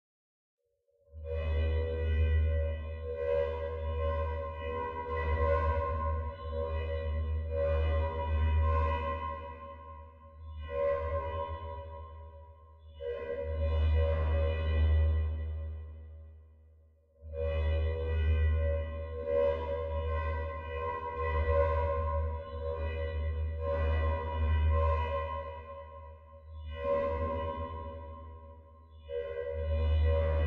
An effect made for an alien feel. With echoes. Made using speech synthesis and vocoding.